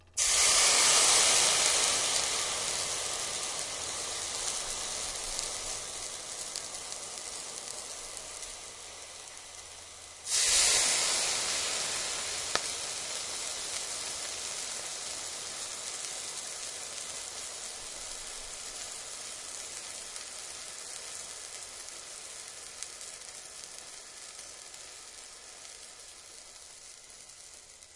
This is an interseting one. It could work for water or fire. I heated a cast-iron pan and poured water onto it. Needless to say the water fizzled and quickly vaporized/evaporated.
fire, fizzle, stovetop, vaporized